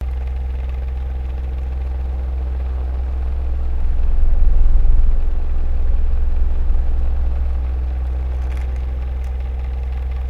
G5 celling fan
a ceiling fan turning round during a hot summer day
fan, working